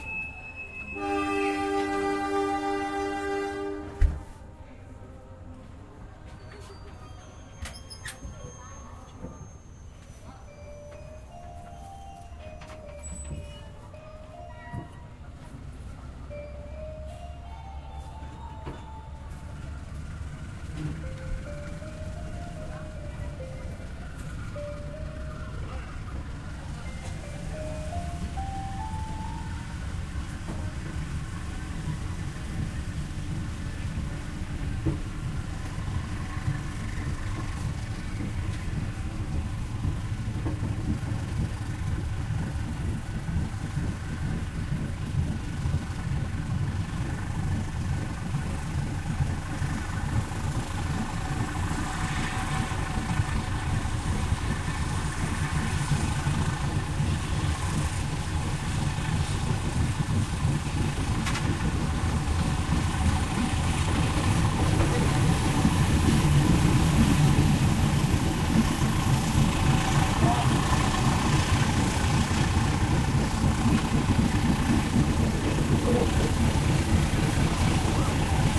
Train leaves Purwokerto Station. The station's announcement tone, a rendition of "Di Tepinya Sungai Serayu" can be heard. Recorded from the train with a Zoom H4N.
indonesia, purwokerto